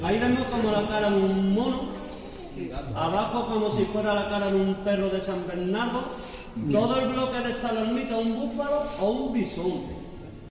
cave.large.hall
guide speaking to tourists inside a large cave hall. Echoes. Some murmuring in the backgroud /guia hablando a turistas en una sala grande de la cueva de Aracena. Ecos. Fondo con algunos murmullos.